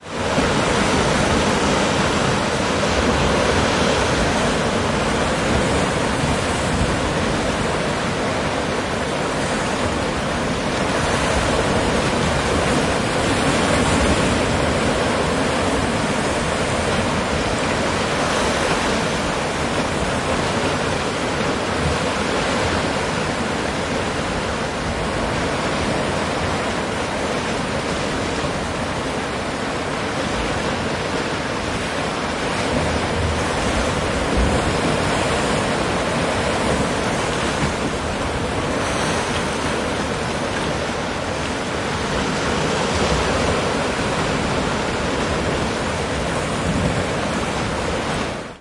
Waves Crashing - Riomaggiore, Italy 01
Sound of waves crashing along the northwestern coast of Italy
field-recording, italy, coast, crashing, ambience, shore, nature, beach, ocean, water, waves, sea